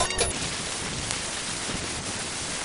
sample exwe 0306 cv fm lstm 256 3L 03 lm lstm epoch2.88 1.6778 tr
generated by char-rnn (original karpathy), random samples during all training phases for datasets drinksonus, exwe, arglaaa
generative, char-rnn, recurrent, neural, network